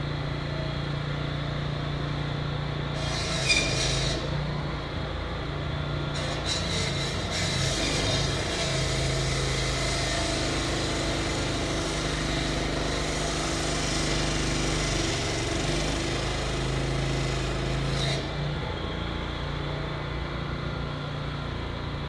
cutting concrete
A long instance of a construction worker cutting up the concrete sidewalk.